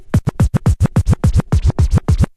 beat stab1
Juggling a kick with a vinyl record.
beat, beats, dj, hip, hop, kick, turntable